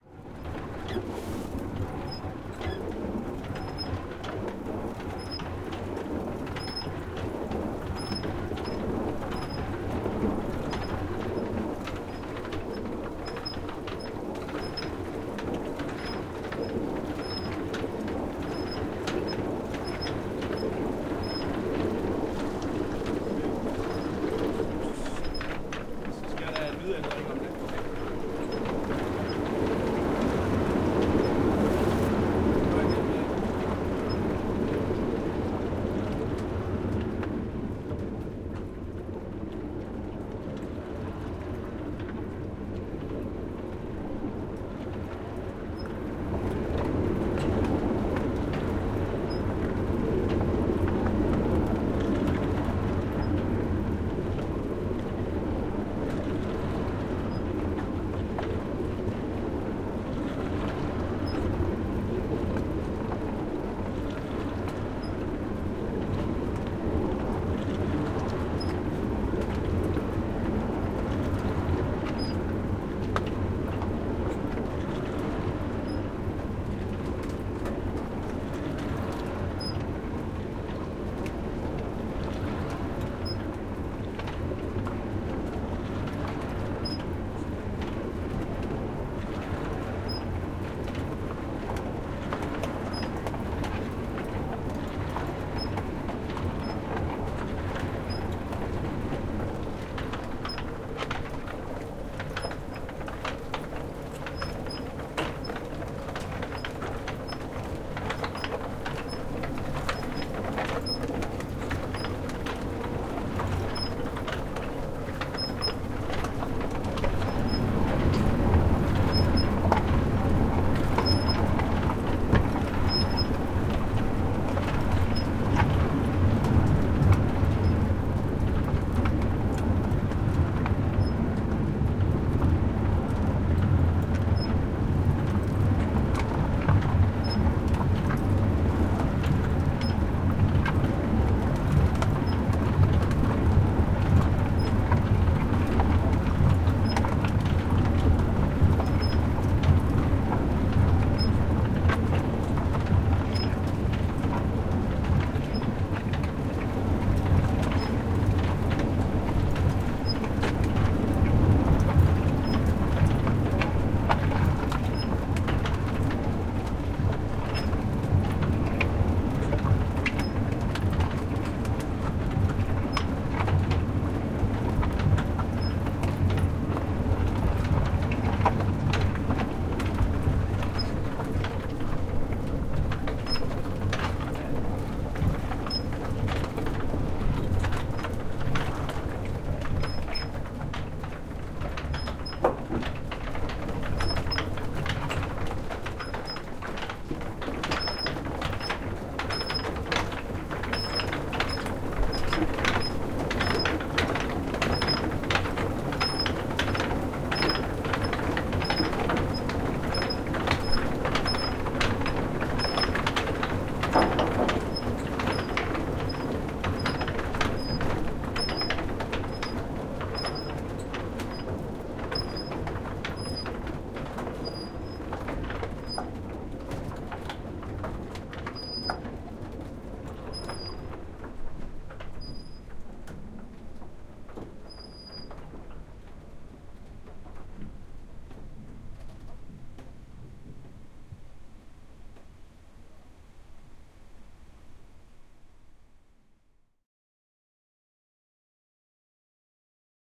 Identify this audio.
Recording of a watermill at an outdoors museum in Denmark. Recording is a close up recording of the grinder/rocks. Recording is of mill with and without grinding corn to flower. Sound of water, some voice and slow to fast grind.
old, mill, fieldrecording, watermill